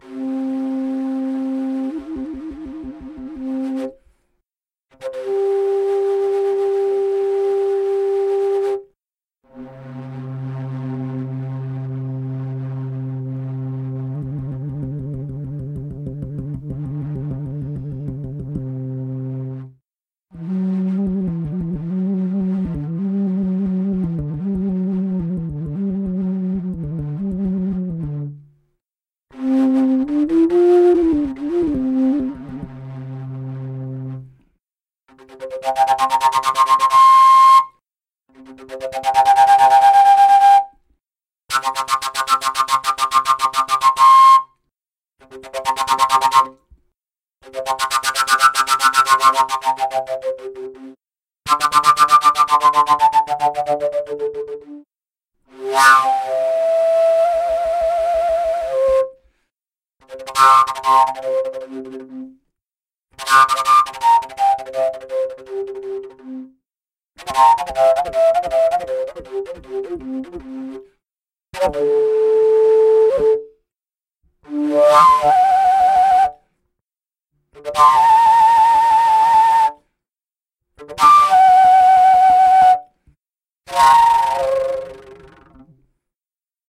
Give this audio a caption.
The Fujara - A MakeNoise Morphagene Reel
The sound of the fujara - a large, sophisticated, Slovakian folk shepherd's overtone fipple flute of unique design - formatted for use with the MakeNoise Morphagene eurorack synthesizer module.
Please link to this page or to the above originals, if you use it for any releases of your own.